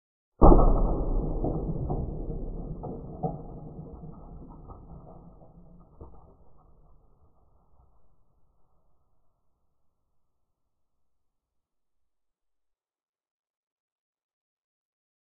Popcorn Pop 16X Slower
The sound of a single piece of popcorn popping slowed 16 times. What happened in 937 ms now takes 15 seconds. Recording chain: AT3032 stereo microphones - Edirol R44 digital recorder.
popcorn, slow, pop, boom, explode, bang, experimental, forensic-sound